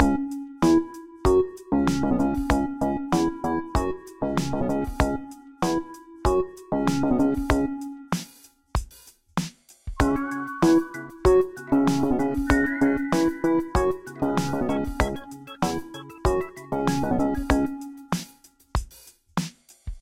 Funk Lead Loop
This is a funky loop I created for a friend for a new vlog. Perhaps to be used for an intro, perhaps to be used for a background filler.
music, underscore, funk, funky, lead, loop, background-music